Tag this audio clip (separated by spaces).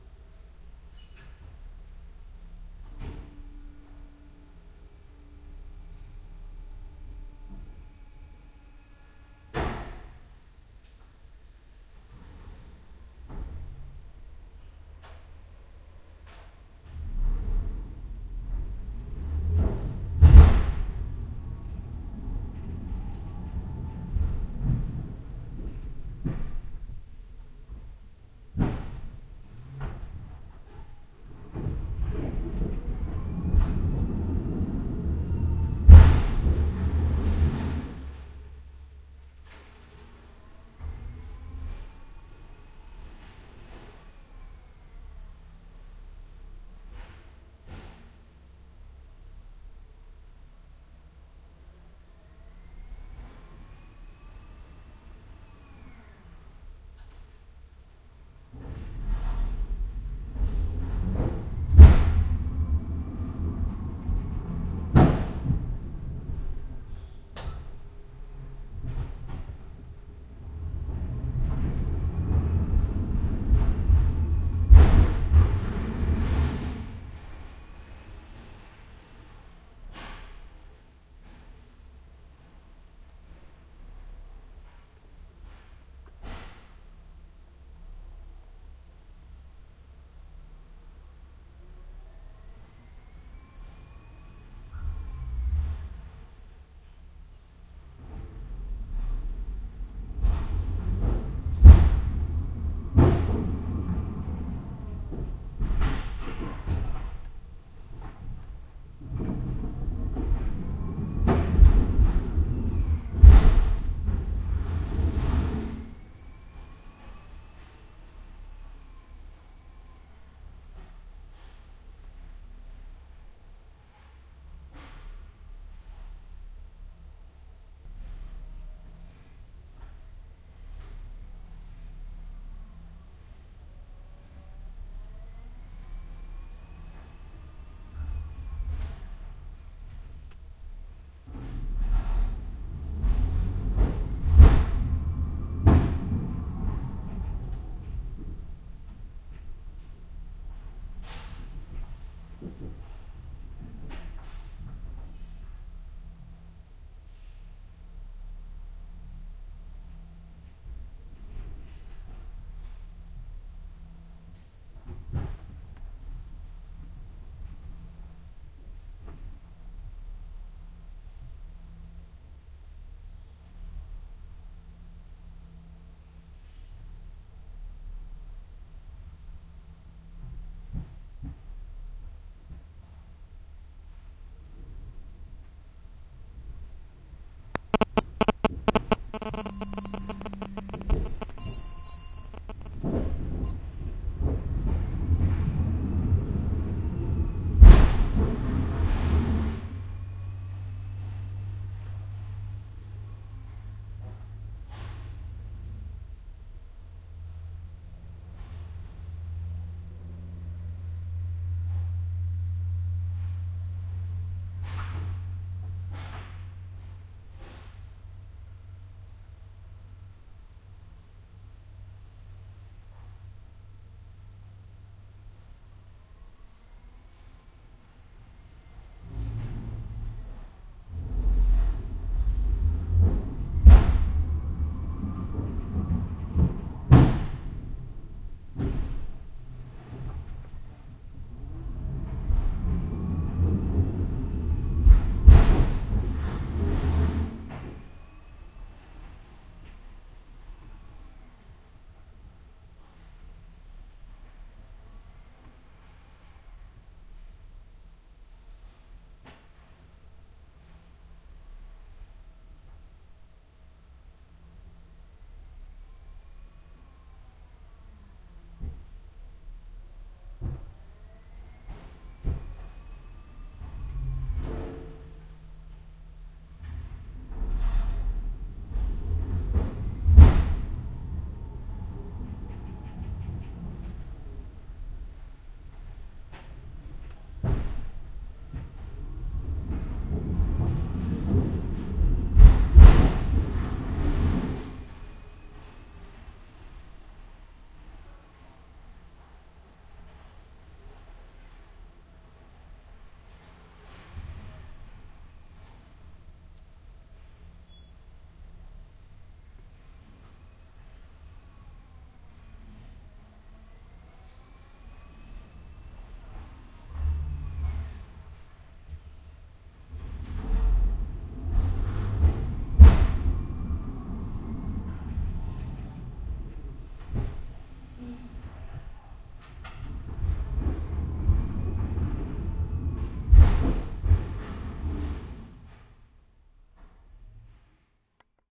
rattle squeal